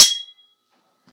Sword Clash (53)
This sound was recorded with an iPod touch (5th gen)
The sound you hear is actually just a couple of large kitchen spatulas clashing together
clang, clanging, clank, clash, clashing, ding, hit, impact, iPod, knife, metal, metallic, metal-on-metal, ping, ring, ringing, slash, slashing, stainless, steel, strike, struck, sword, swords, ting